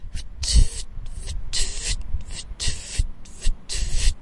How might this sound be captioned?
LEE RdR XX TI02 ftuweftuwe
Sound collected in Leeuwarden as part of the Genetic Choir's Loop-Copy-Mutate project.
City, Leeuwarden, Time